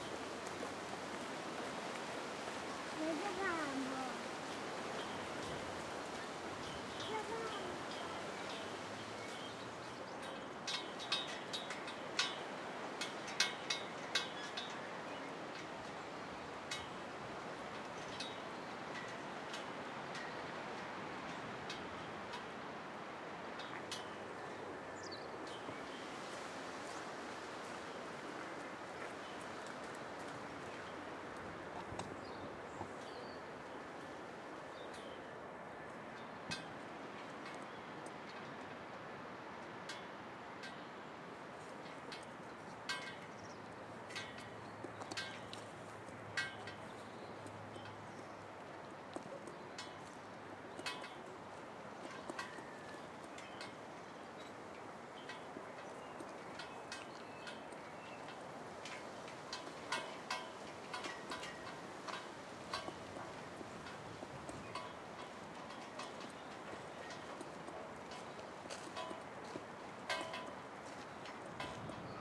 Ambiente - campo con bandera tranquilo
Environment of a quiet yard with a flag rope beating
MONO reccorded with Sennheiser 416
ambience, flag-rope, yard